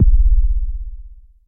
ms-kick 3
Percussion elements created with the Korg MS-2000 analog modeling synthesizer for the album "Low tech Sky high" by esthing on Friskee Media